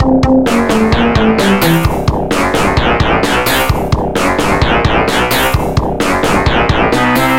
Happy Loop #2
A happy loop made in FL Studio.
2021.
drums, funny, playground, synth